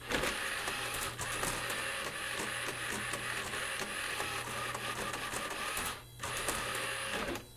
Me playing jingle bells with the cd drive on an old dell xps-400.
Lol actually its me just opening and closing the drive in rapid succession.
cd, computer, robot, motor, closing, drive, opening